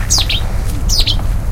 We found this diurnal nightingale, a common little bird all the cities, around the debugging drawers of the river Delta (El Prat de Llobregat, Barcelona).